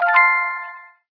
Modification of rhodesmas' Level Up 01
ascending, chimes, game, powerup, score, suscess, up, win